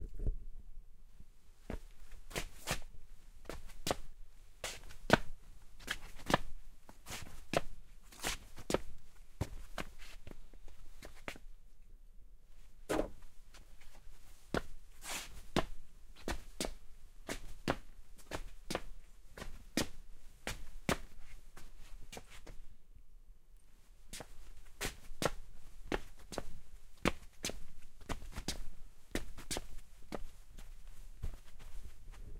FX Footsteps Limping 01
feet, foley, foot, footstep, footsteps, shoe, step, steps, walk, walking